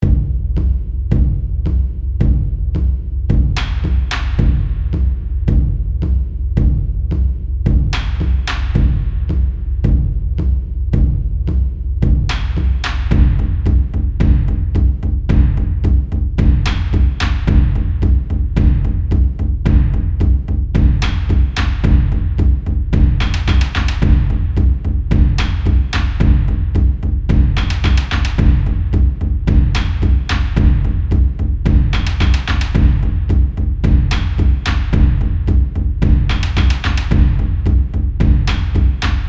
Taiko Drum Sequence for Looping (The Sacrifice)
Taiko Drum Sequence made for looping.
Comprised from a free Taiko Drum sample library I found.
Created in FL Studio 20
BPM: 110
beat, drums, japan, japanese, percussion, taiko